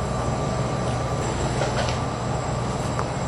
Humming Freezer
A freezer this big
has a hum this loud.
kitchen, hum, industrial